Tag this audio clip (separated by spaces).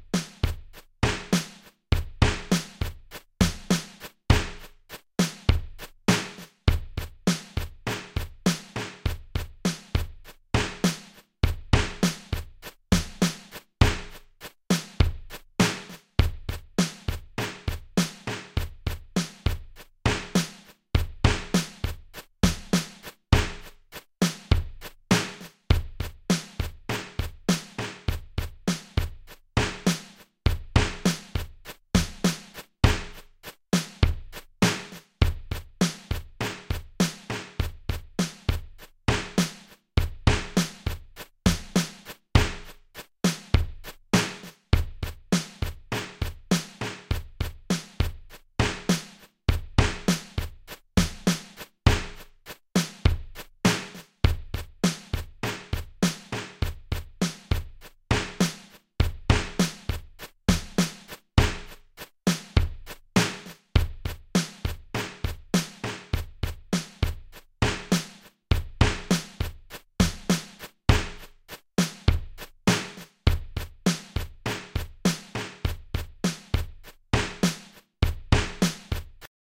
glitch percussive Alesis